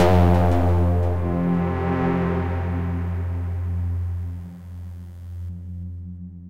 44 ca synth eastern sunrise
drama mystery sunrise
amb, ambiance, ambience, ambient, atmo, atmos, atmosphere, atmospheric, background-sound, city, fi, general-noise, horror, music, sci, sci-fi, score, soundscape, white-noise